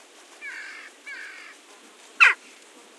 20161002 jackdaw.vocalization.02
Single Jackdaw calling. Audiotechnica BP4025 > Shure FP24 preamp > Tascam DR-60D MkII recorder
south-spain, field-recording, birds, Western-jackdaw, nature